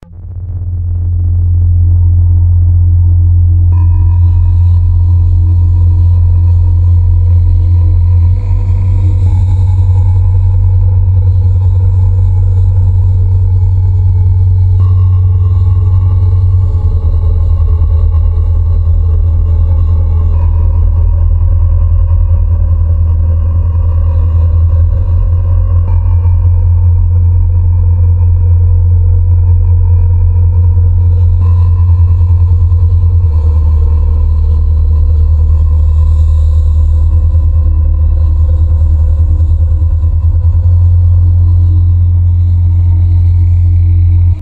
Creepy Ambience 01: Empty Sewer
A chillingly dark and atmospheric piece of music is required to set the perfect scene. Creepy Ambience 01 is just that, with subtle drones, some static and echoing piano - plus heavy bass.
I created Creepy Ambience 01 in FL Studio using the Nexus 2 add-in. I'd also love to see where you use this piece so please drop us a little message in the comments section below.
Amb, Ambiance, Ambience, Ambient, Atmosphere, Cinematic, Creepy, Dark, Drone, Echo, Eerie, Environment, Evil, Fantasy, Film, Free, Game, Horror, Loopable, Movie, Music, Scary, Sci-Fi, Sound, Sound-Design, Spooky, Strange, Wind